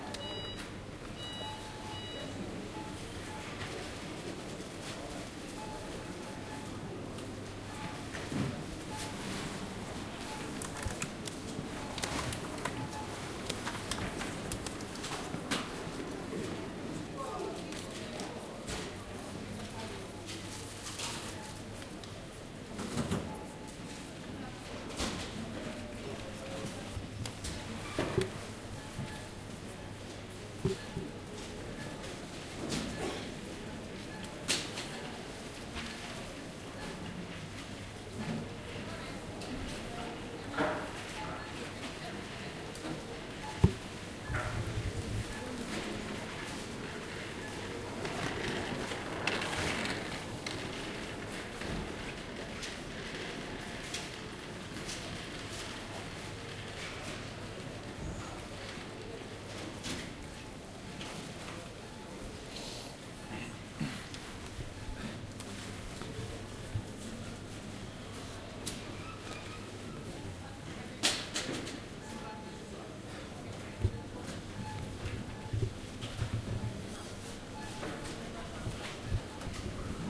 Shop cash register, items scanned with cash register, location: Riihimaki - Finland date: year 2013